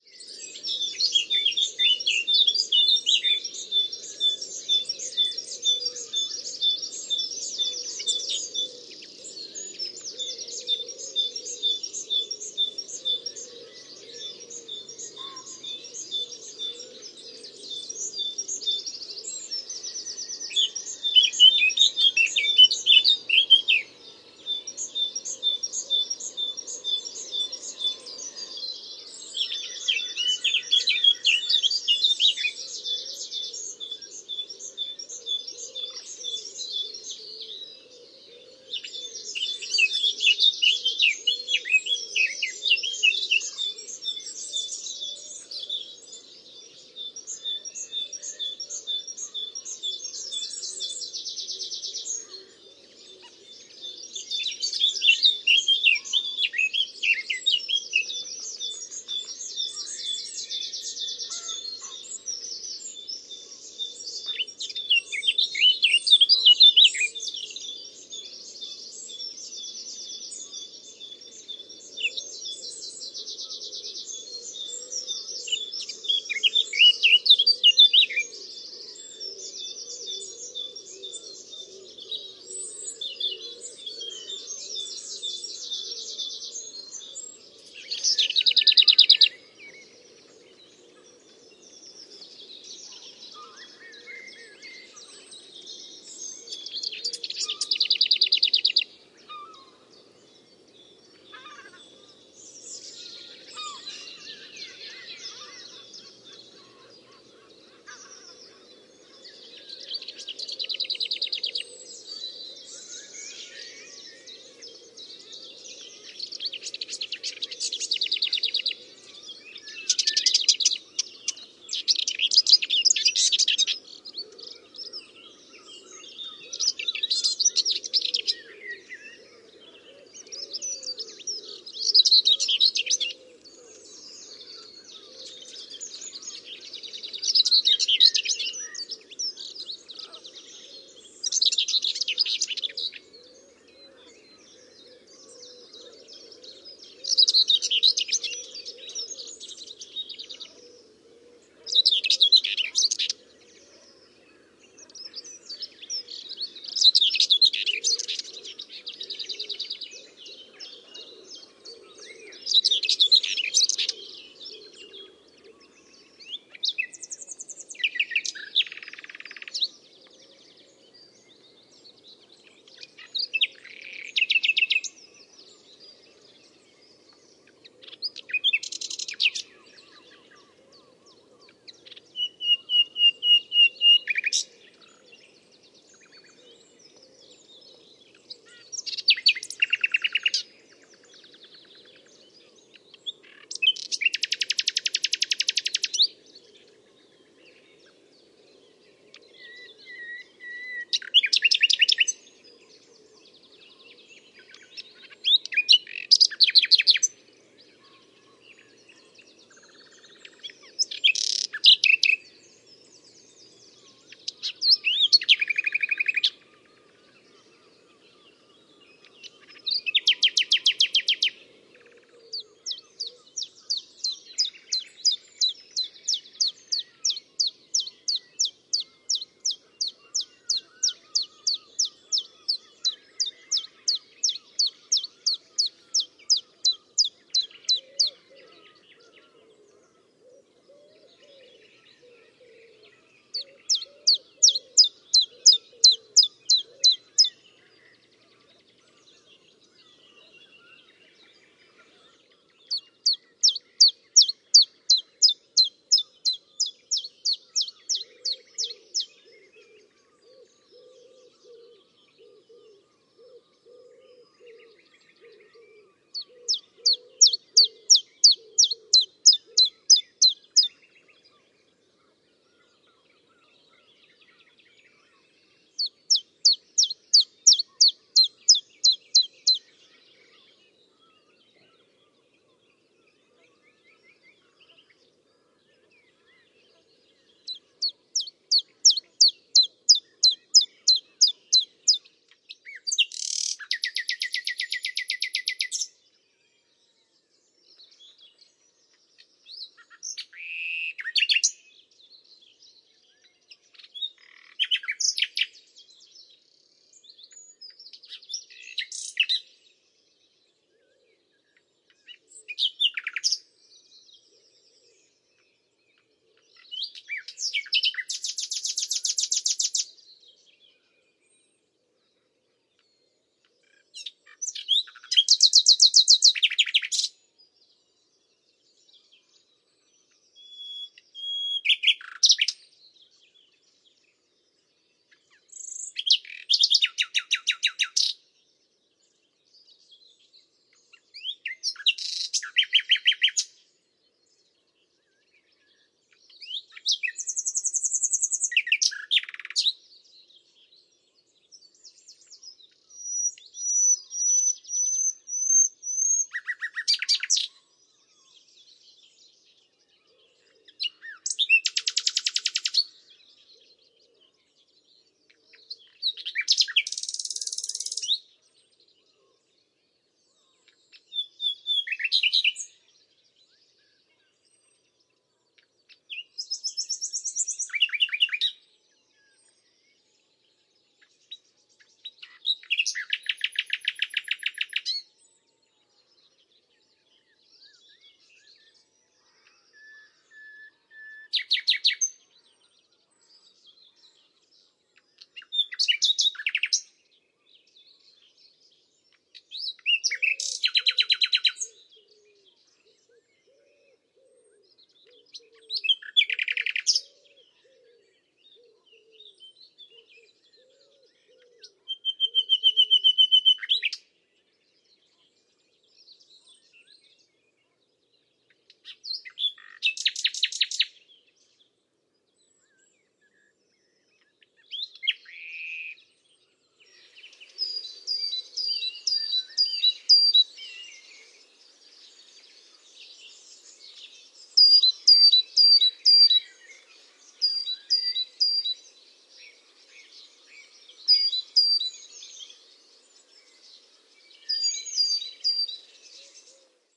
Wrabness Sound Safari
This is a 'sound safari' recorded at Wrabness Essex Wildlife Trust Nature Reserve, UK. The recording was made on the morning of 29/04/2022. This features some of the birds encountered, which are listed below with approximate timings:
00:00 Blackcap and Great Tit
01:28 Lesser Whitethroat
02:00 Common Whitethroat
02:46 Nightingale
03:42 Chiffchaff
04:52 Nightingale
07:07 Great Tit
The nightingales are different individuals heard at different locations.
Other species in the background include pheasant, green woodpecker, moorhen, wood pigeon and others.
Recorded with a parabolic mic and Zoom F6.
bird, birdsong, field-recording